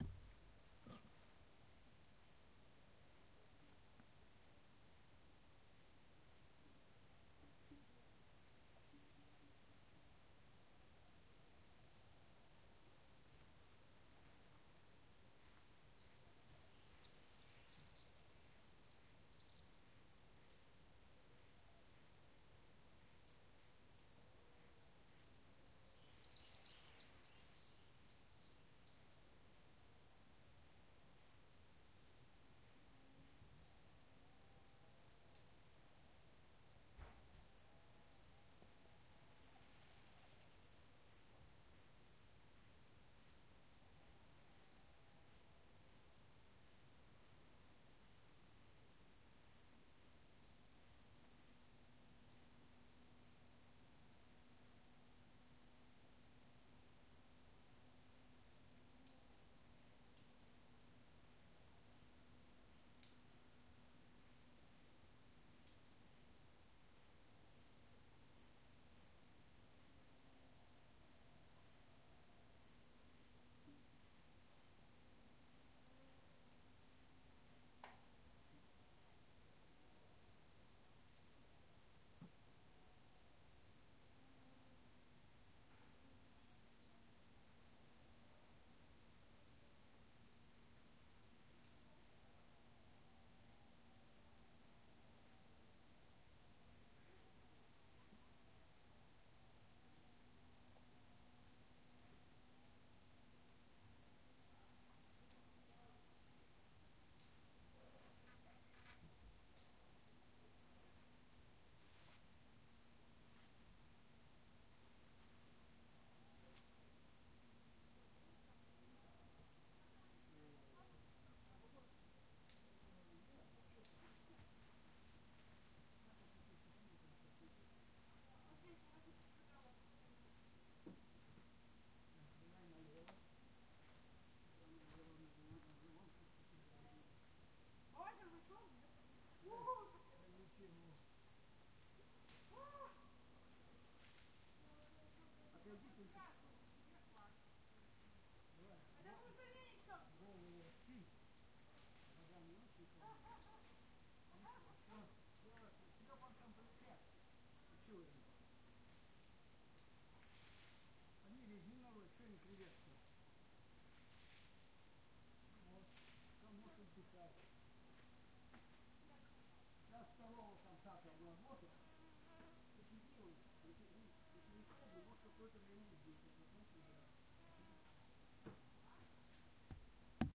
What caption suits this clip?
Very quiet village evening ambience 2
This sound recorded in a small village near Yaroslavl in Russia. Very quiet evening ambience, swifts are flying nearby, also you can hear swamp sounds, frogs, but very distant. Little russian talking in the end.
ambience, birds, evening, quiet, raw, village